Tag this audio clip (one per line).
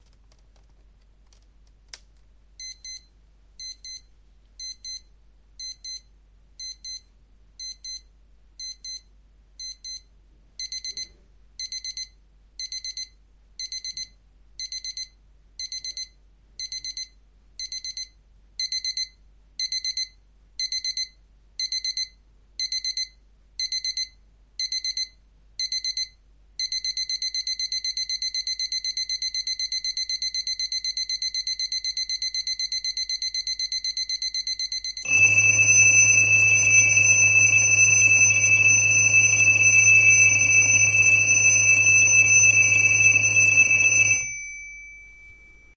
alarm
clock
compression
sample